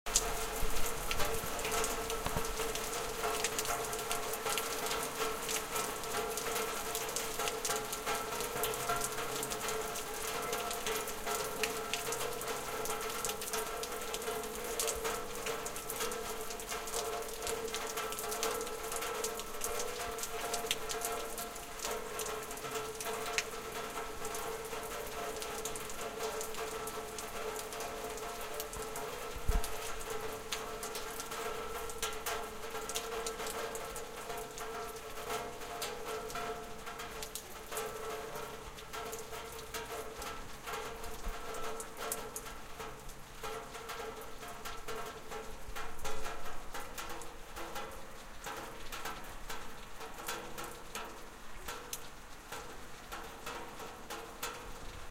Stron rain to Light rain Starker regen zuLeichten RegenmitTropfen
Light, rain, regen, RegenmitTropfen, Starker, Stron, zuLeichten